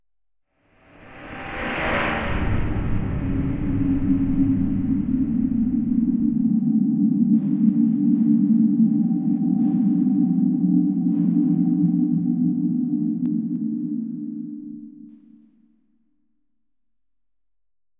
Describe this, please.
Unearthly drone with some kinda "banging" sound in the backround.
That banging actually wasn't intentional at all, it seemed to just be a part of the original generated tones i used as the base sound for this or something.
creepy, drone, scary, unearthly